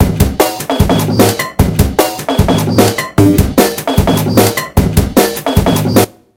AUTO-REMIX
combinationX (slicex vst) accelerate + melodyne software
groovy, beats, quantized, breakbeat, drums, snare, bigbeat, jungle, sampling, drum-loop, drum, beat